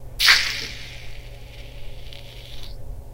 sticking a burning incense stick into the bathtub